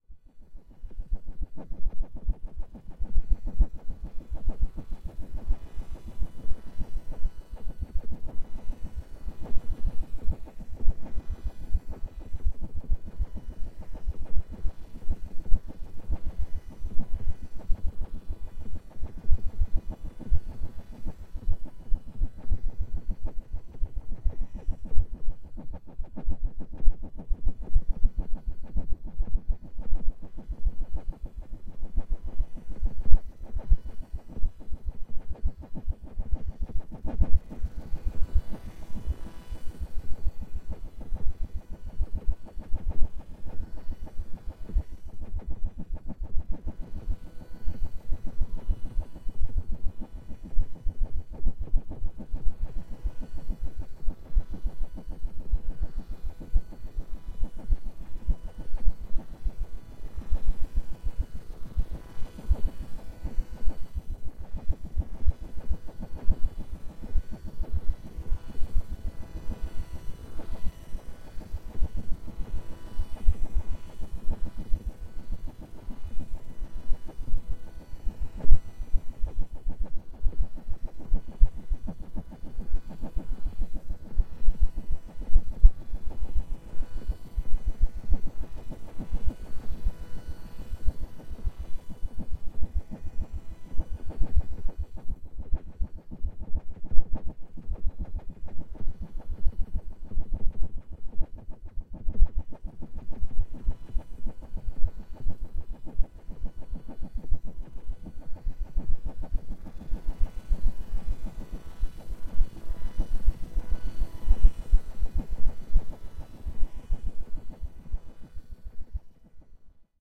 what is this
Noise Garden 15
1.This sample is part of the "Noise Garden" sample pack. 2 minutes of pure ambient droning noisescape. Repetitive random noise bursts.